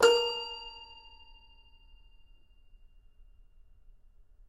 Toy records#15-A#3-01
Complete Toy Piano samples. File name gives info: Toy records#02(<-number for filing)-C3(<-place on notes)-01(<-velocity 1-3...sometimes 4).
sample, toypiano, instrument, samples, piano